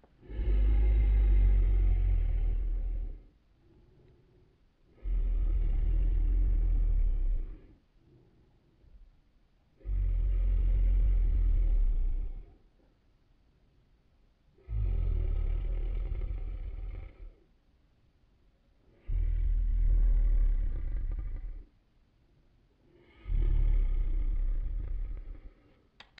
Moaning a monster or dragon
Dragon, monster, shout